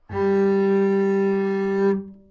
Double Bass - G3
Part of the Good-sounds dataset of monophonic instrumental sounds.
instrument::double bass
note::G
octave::3
midi note::55
good-sounds-id::8620
good-sounds, single-note